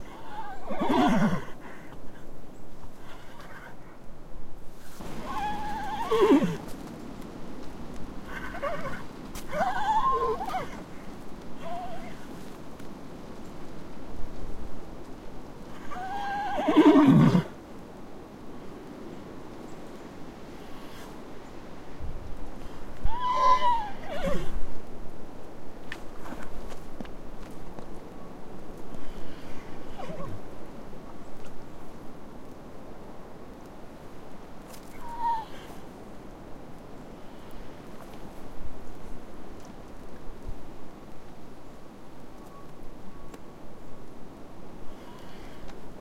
Delicate horse sounds, he was shy.
neigh, horses, delicate, horse